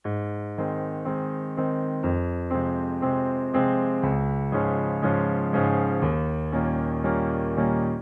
piano-loop in Aes-major 3
piano-accomplisment with left hand, to replace bass or use as intro.
piano, loops, Yamaha-clavinova, cinematic, 100bpm, dark, 120bpm, piano-bass, background